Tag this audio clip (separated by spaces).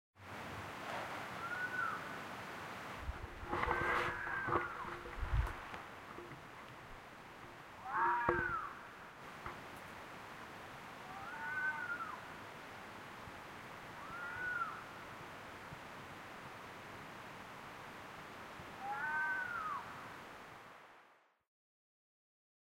fox winter howl